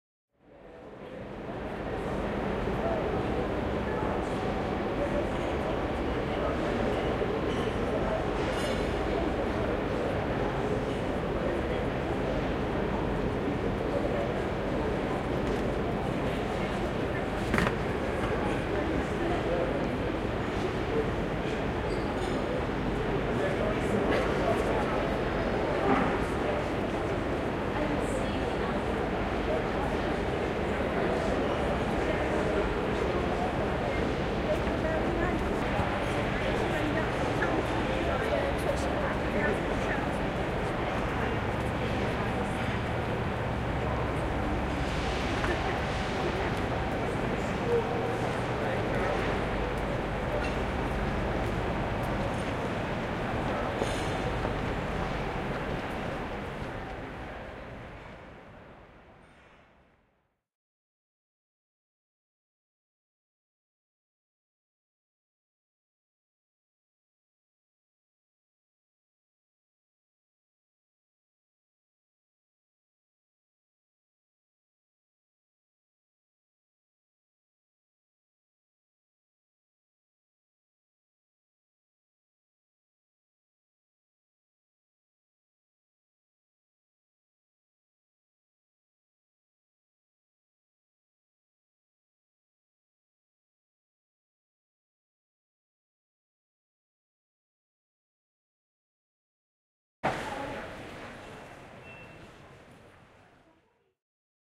808 St Pancras ambience 4
Please note this file has uploaded with an error - there is a long silence just after halfway through. The general sounds and ambience of a large station - in this case London St Pancras, now beautifully refurbished and with a direct Eurostar connection to Paris and beyond. Announcement of delays on the Picadilly Line.
announcement, field-recording, london, platform, railway, st-pancras, train